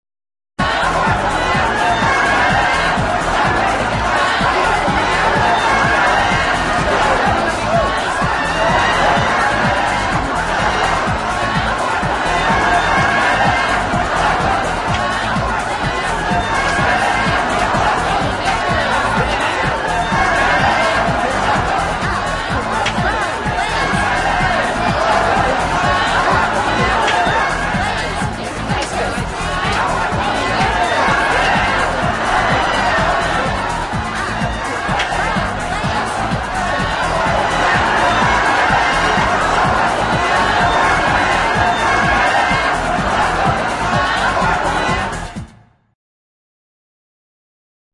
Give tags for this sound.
weekend
party
people

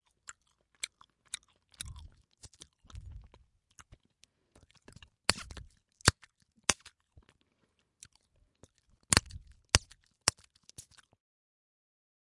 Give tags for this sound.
bubble
chewing
gum
pop
poping

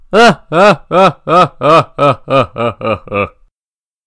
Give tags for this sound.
laugh
devil
evil

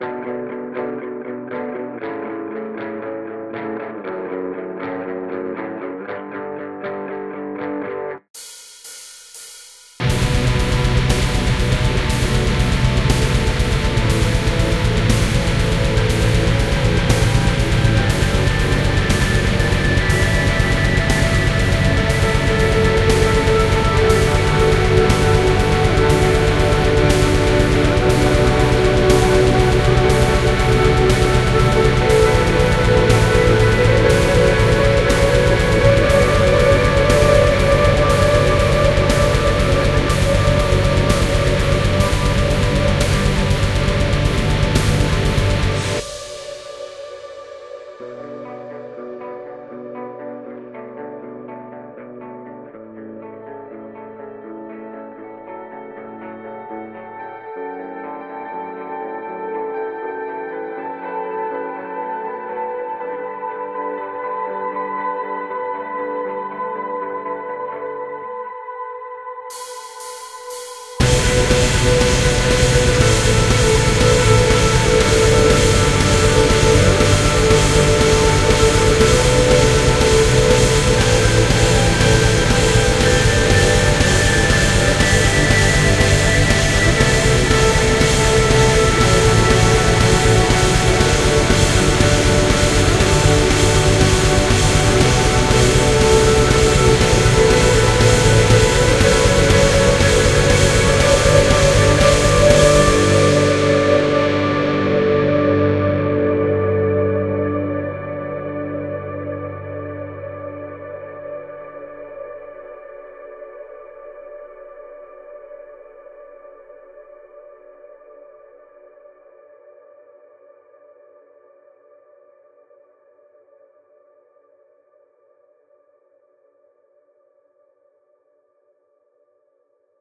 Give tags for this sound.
song
bass
reverb
guitar
drums

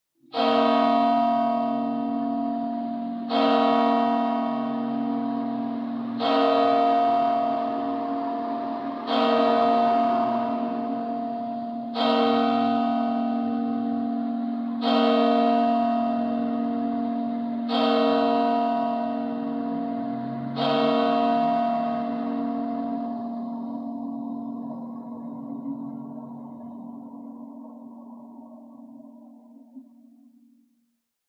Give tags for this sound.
bells
church
time

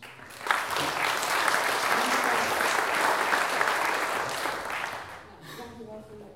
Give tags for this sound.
medium
clap
clapping

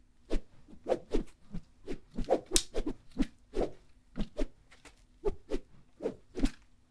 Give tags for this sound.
high
whooshes

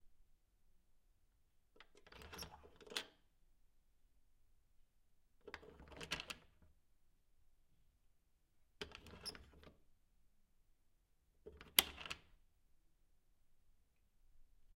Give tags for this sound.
key
locking

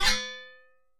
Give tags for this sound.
effects
Gameaudio
indiegame
SFX
sound-desing
Sounds

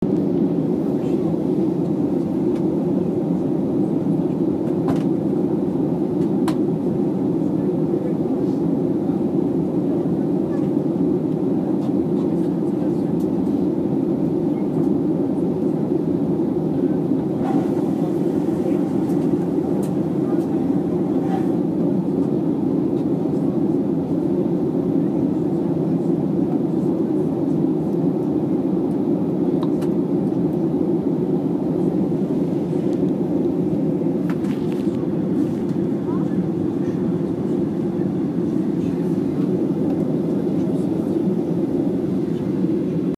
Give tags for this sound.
general-noise
environment
cabine
airplane
field-recording

